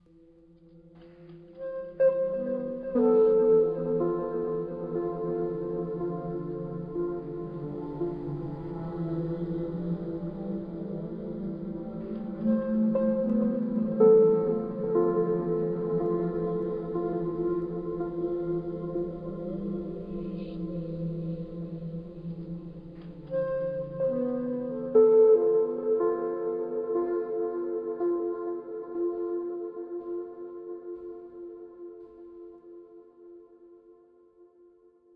A repeated synth phrase over a drone-like choir sound. Part of my Atmospheres and Soundscapes pack which consists of sounds, often cinematic in feel, designed for use in music projects or as backgrounds intros and soundscapes for film and games.
ambience, choir, cinematic, electronic, music, processed, rhythmic, synth, voice